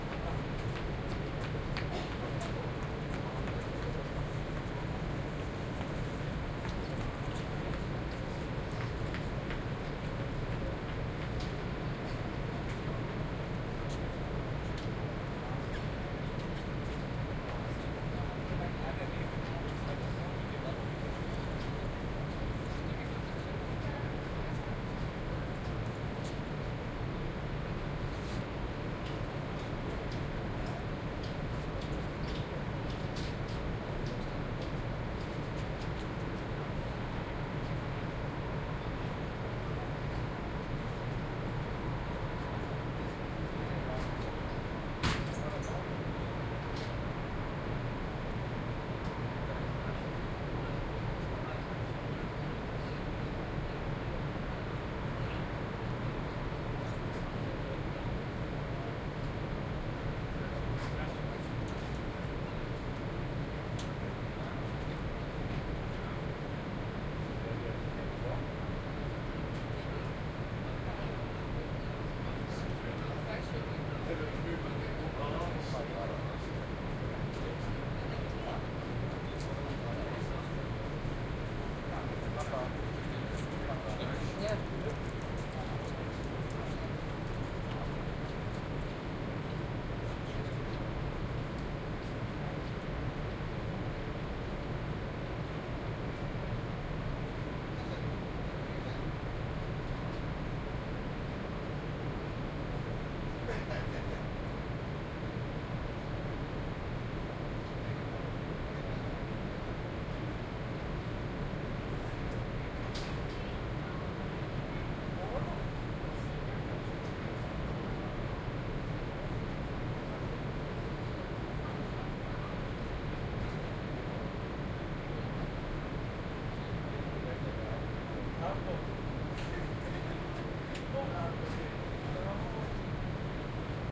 The ferry was still anchored in the harbour of Ijmuiden, when I did this recording on deck 8 in August 2009.OKM microphones, A 3 adapter into R-09HR.
binaural, ijmuiden, ferry